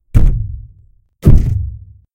Just another thud
fight, thud